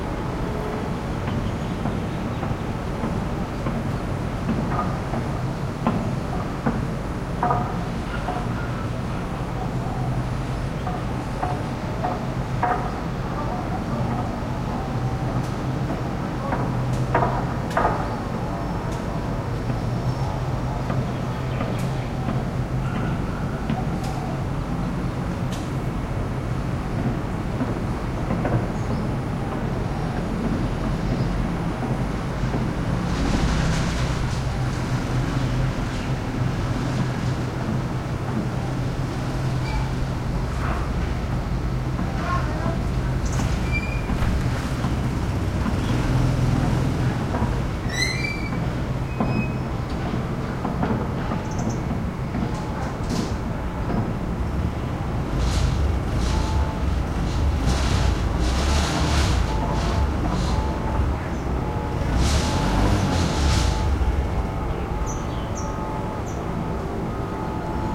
Thailand Karon skyline day from 2nd floor balcony distant traffic haze and construction banging